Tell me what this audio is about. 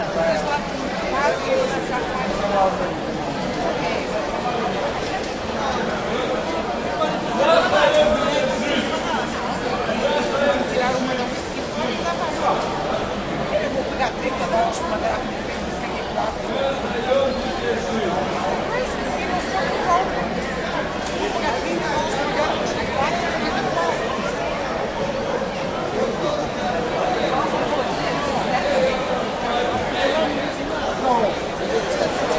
airport waiting area busy1
airport waiting area busy